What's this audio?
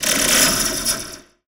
Broken Magic Spell
Slinky-based failed magic spell.
Recorded with a Zoom H2. Edited with Audacity.
Plaintext:
HTML:
magic, rpg, evil, game, spell, fail, bad, broken, game-design, spellcaster, failure, failed